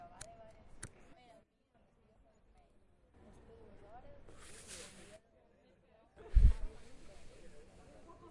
This sound is when someone wants to light a cigarette.
campus-upf, square, UPFCS12